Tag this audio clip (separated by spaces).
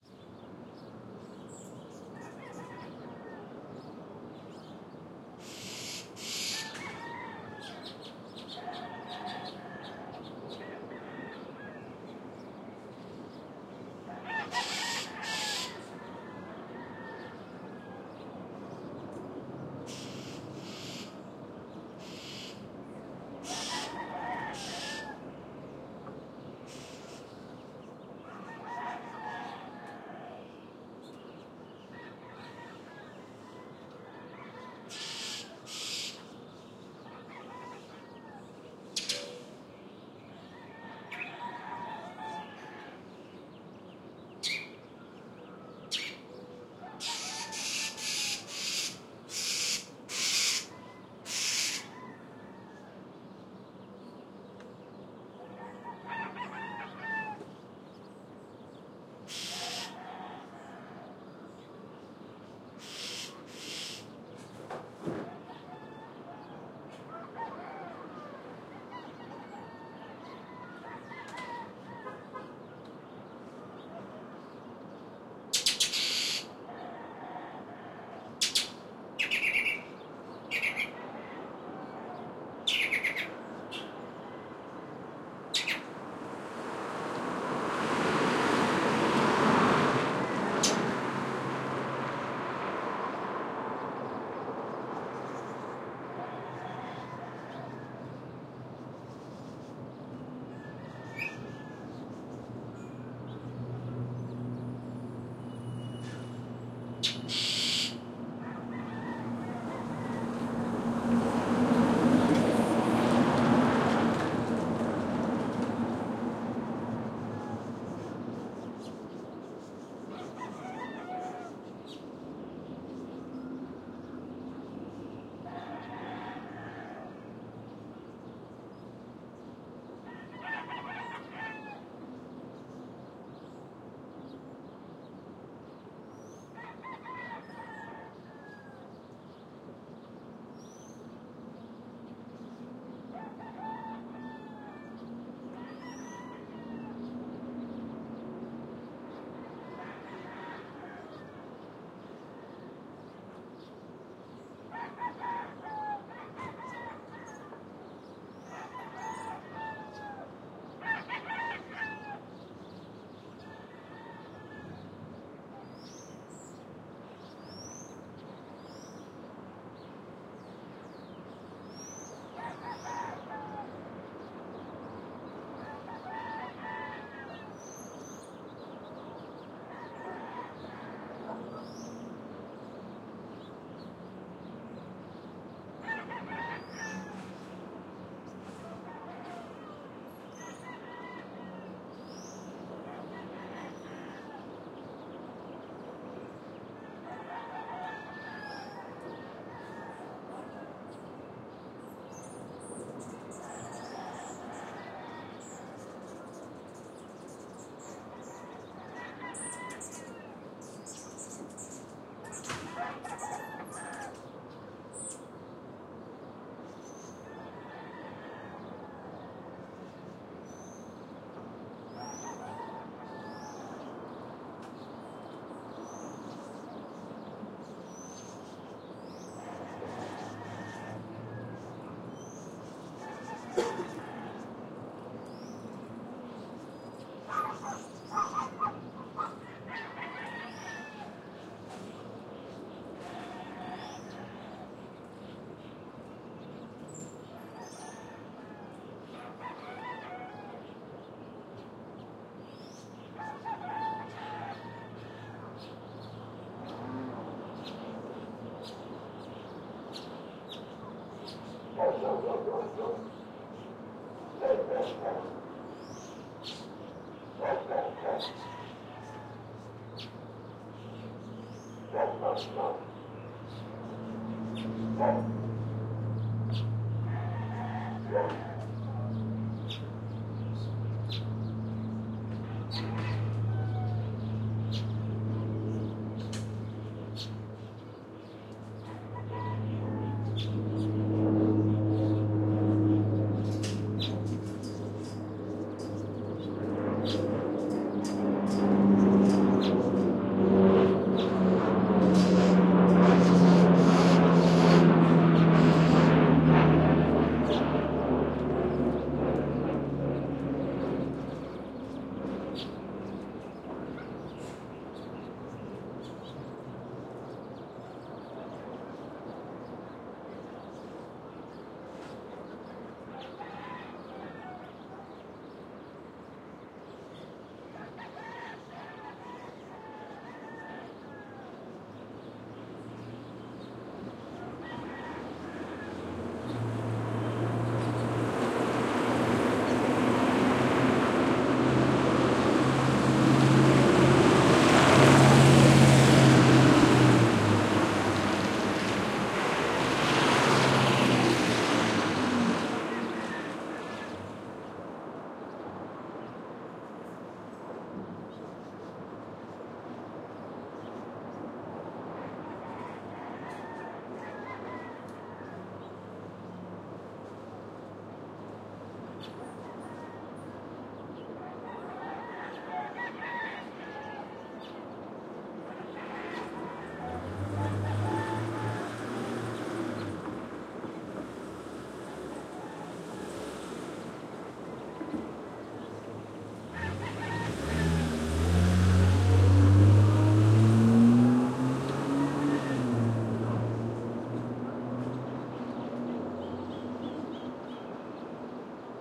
car traffic rooster birds ambience city chicken residential morning